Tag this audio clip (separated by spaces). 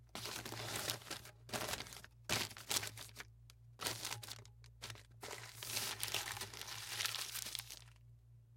bottle Crinkling shuffling bag paper putting